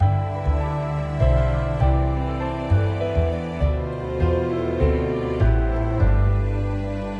Loop with strings, piano, harp and bass
loop, 100-bpm, strings, piano